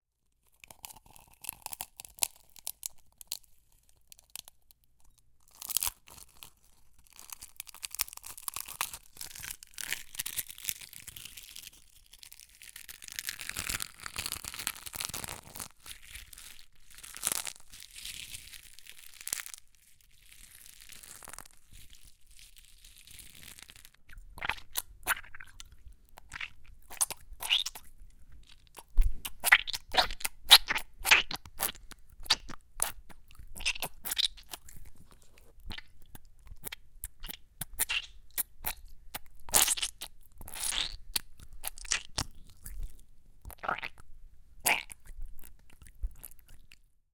Egg crack and pulp
Miked at 4-6" distance.
Egg cracked and emptied into a ceramic bowl; pulp squished between fingers.
crack, egg, eggshell, fluid, food, gelatinous, oozy, pulp, slick, Squish